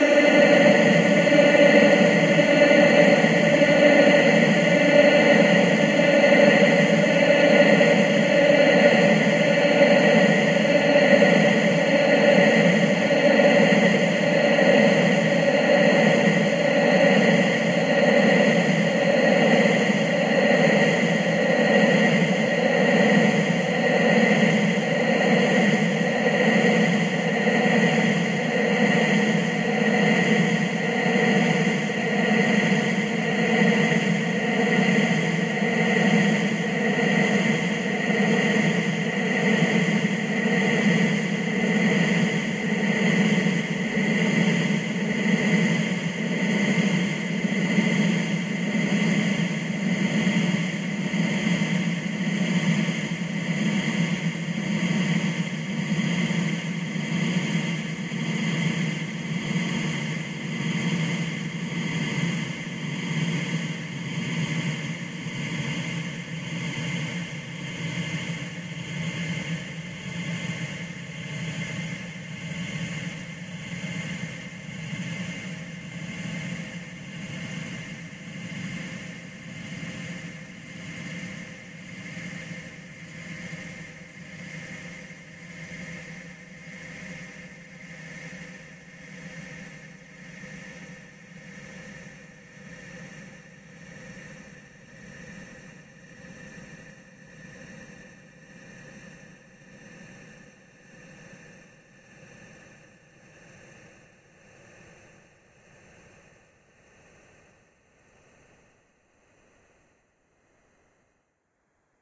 INSWIJM Isolated Then Stretched
An Infinite Crowd of Glitchy Laughs.
Original Sound recorded with a Galaxy S7.
Audio was echoed and paulstretched in Audacity.
Recorded July 5th, 2017
laugh, creepy, voices, ghost, horror